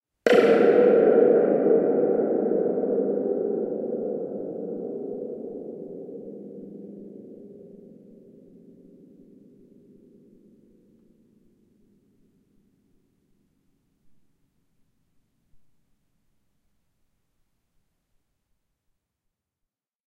Raw audio of striking a large thunder tube with a metal mallet. The coil was left dangling in the air. Recorded simultaneously with the Zoom H1, Zoom H4n Pro, and the Zoom H6 (XY capsule) to compare the quality.
An example of how you might credit is by putting this in the description/credits:
The sound was recorded using a "H1 Zoom recorder" on 11th November 2017.